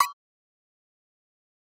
Tweaked percussion and cymbal sounds combined with synths and effects.
Short, Percussion, Abstract, Agogo, Oneshot